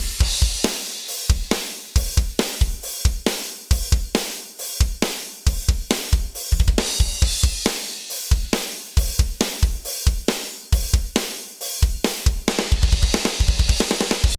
Dynamic Drums Loop
Puch-kick
140 bpm